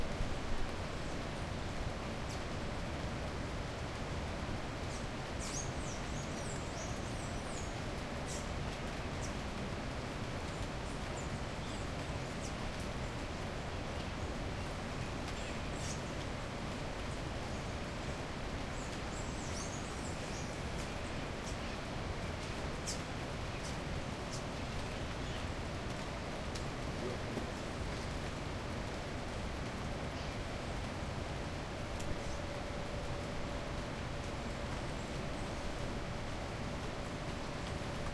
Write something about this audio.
HummingBirds Peru STE
Stereo recording of hummingbirds feeding and fighting in the forest of the Peruvian Andes near the Machu Picchu and quite close to a strong river.